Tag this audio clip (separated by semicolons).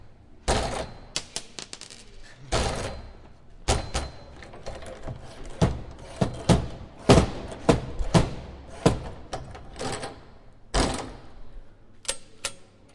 Campus; futbolin; UPF; bar; Poblenou; football